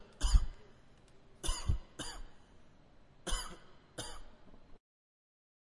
short audio file of a male cough
noise, sick